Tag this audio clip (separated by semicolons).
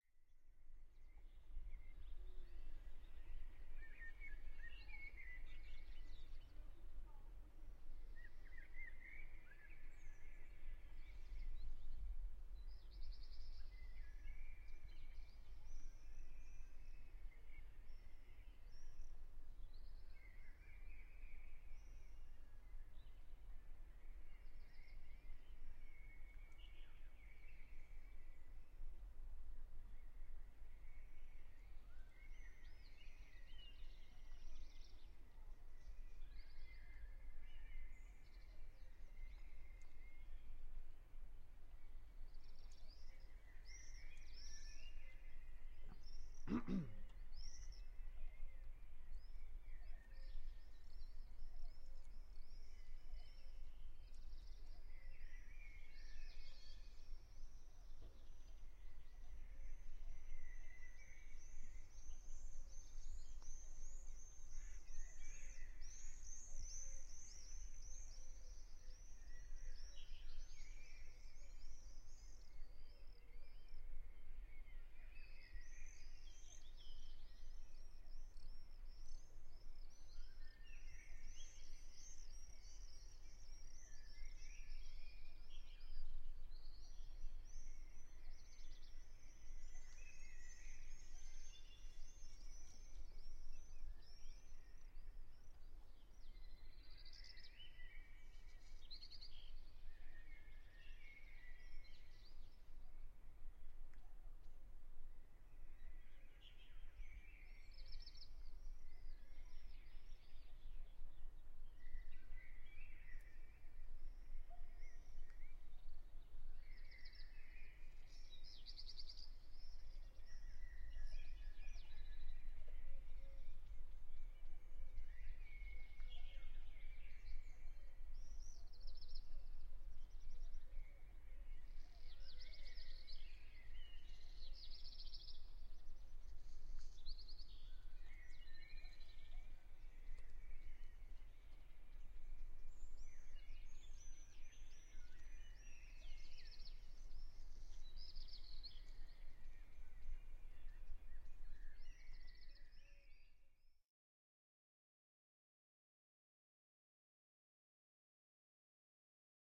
atmosphere; village; evening; ambience